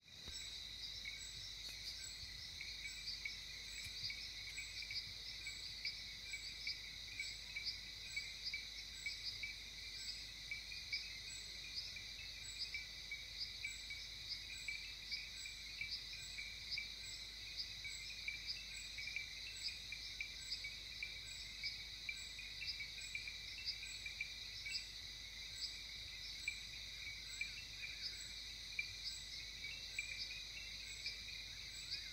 Ambient OkavangoNight02
The Okavango at night
Frogs, Birds, Wilderness, Ambience, Night, Environment, Wetland, Country, Ambient, Dusk, Atmosphere